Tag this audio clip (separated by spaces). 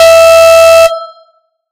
Alarm Fire Attack Emergency Noise Fiction War